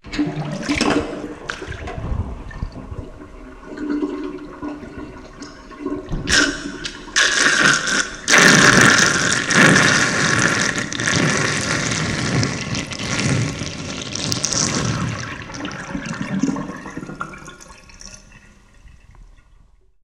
Kitchen-Sink-Drain-8
This is a stereo recording of me draining my kitchen sink. It was recorded with my Rockband USB Stereo Microphone. It was edited and perfected in Goldwave v5.55. The ending is intense in this audio clip! I filled my sink about half full (it is a double, stainless steel sink), and I pulled the stopper from the sink, and about 5-10 seconds later, a vortex showed up, and there was some pretty good action going on! Loud and proud...just the way I like it! Enjoy!
sink, glub, plughole